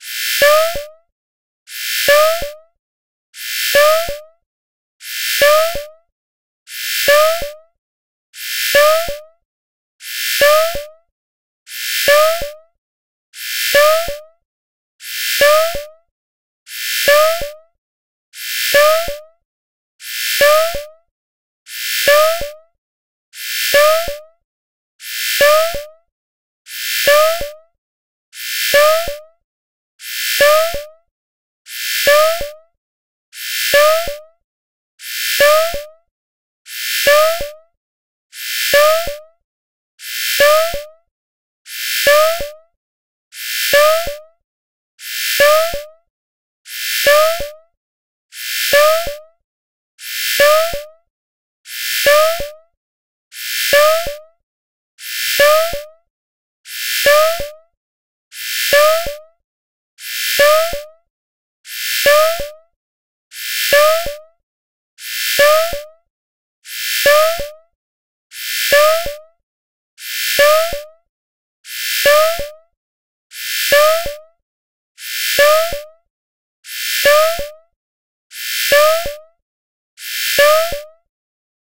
Game Radar
Mixing a few bfxr sounds into a cool/cute radar signal sound.